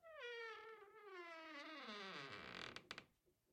The sound of a wooden door creaking as it is opened.